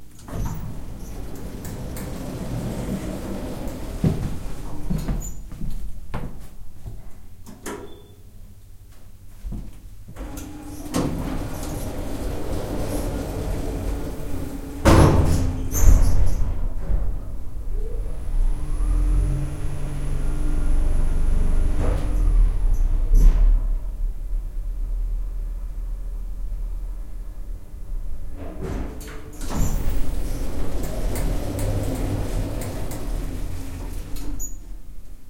Big elevator going down
Door opens. Walking in. Pushing button, The elevator door closes, machine sounds are heard and goes down one level. Door opens again.